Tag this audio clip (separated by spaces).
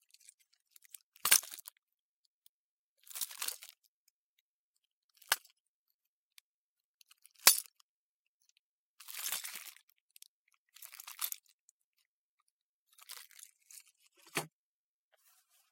clicks foley mono plastic taps